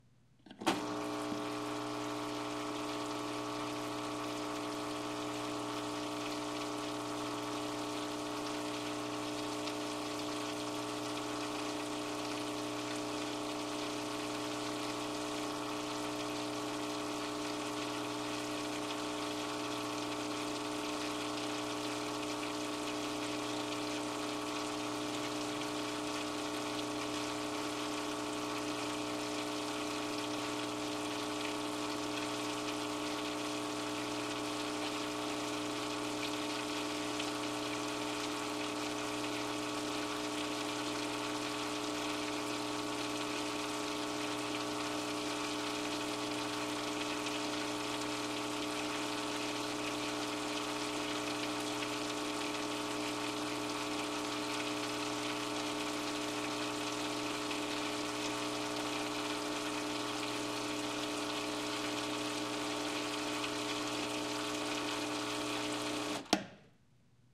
CR BrokenCatBowl
The sound of a broken motorized bowl for a cat's water.
broken, cat, motor, off, water